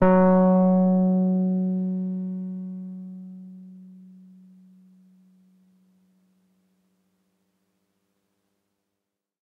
My Wurlitzer 200a Sampled thru a Lundal Transformer and a real Tube Preamp. The Piano is in good condition and not bad tuned (You still can retune 3 or 4 Samples a little bit).I Sampled the Piano so that use it live on my Korg Microsampler (so I also made a "msmpl_bank")
200a, electric, e-piano, wurlitzer